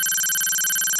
digital typing
This sound I created in 2017 for one of the projects for the appearance of the text. Sound was created in FL Studio.
keystroke, typewriter, clicks, typing, keyboard, fx, beep